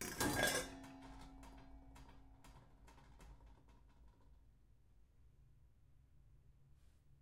pots n pans 10
pots and pans banging around in a kitchen
recorded on 10 September 2009 using a Zoom H4 recorder
kitchen, pans, pots, rummaging